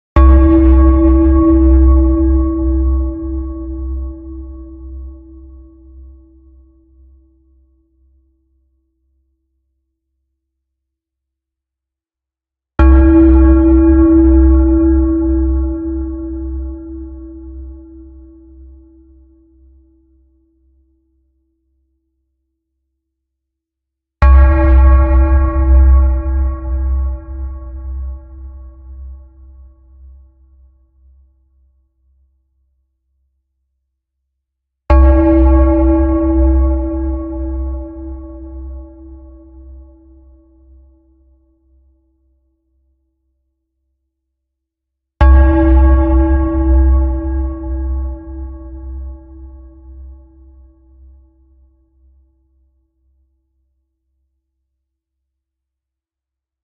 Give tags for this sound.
background
creepy
dong
haunted
reverb

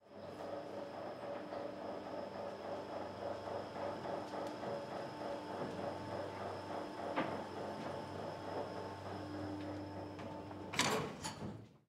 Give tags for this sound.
elevator
door
recording

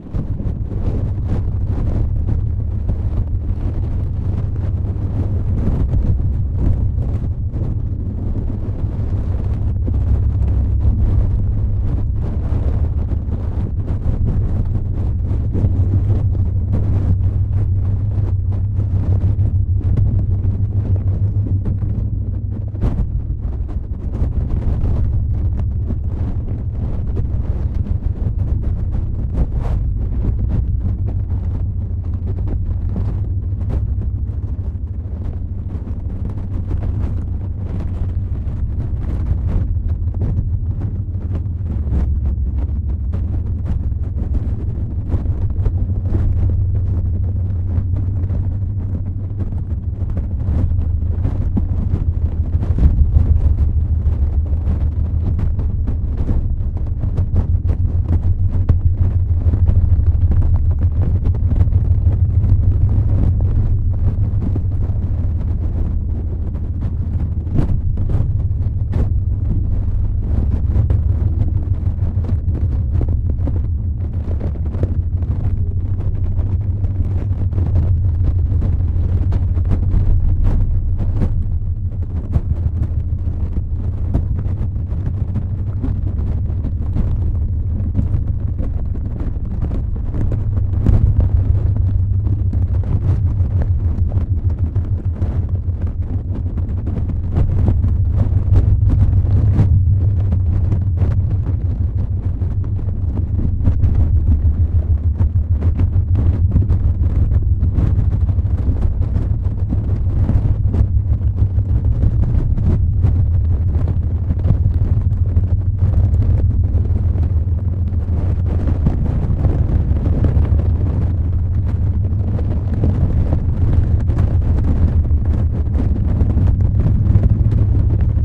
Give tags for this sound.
sound-design
lo-fi
sound
sounddesign
effect
abstract
noises
soundeffect
movement
strange

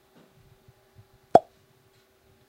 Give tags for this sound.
thumb
pop
smack

